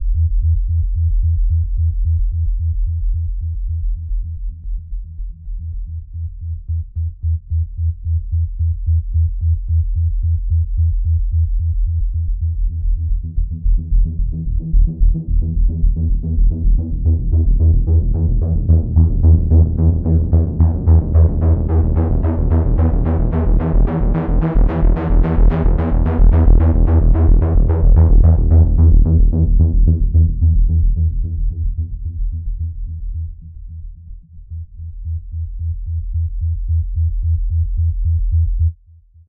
39 seconds bass loop. Great for film sound